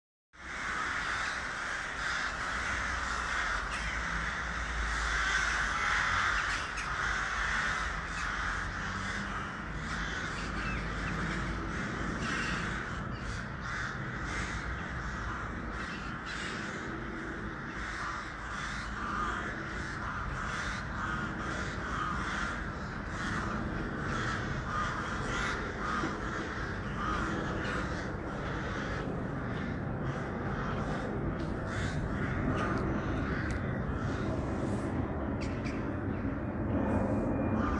a murder of crows over Luxembourg City
birds, crows, city, field-recording, murder